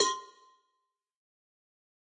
Cowbell of God Tube Lower 028
cowbell, home, metalic, god, trash, record